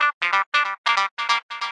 TR LOOP 0414
loop psy psy-trance psytrance trance goatrance goa-trance goa